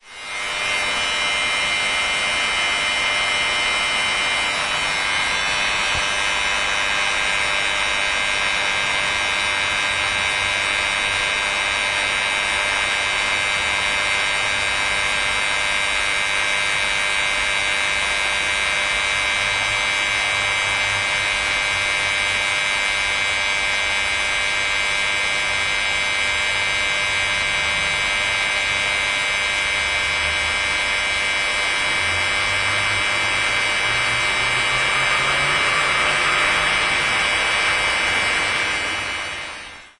26.08.09: the evening two power transformers next to the Raczynskich Library on Plac Wolnosci (the center of Poznan/Poland).